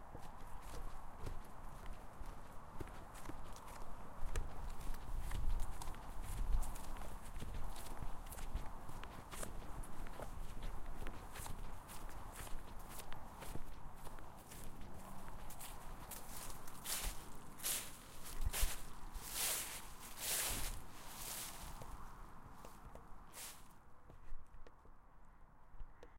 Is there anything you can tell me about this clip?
Leaves walking
Me walking in the leaves in autumn
foley, walking